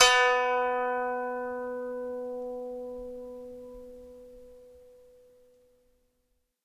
Acoustic Monochord Sample - Single Note
acoustic, guitar, harp, monochord, pluck, string, twang, ukulele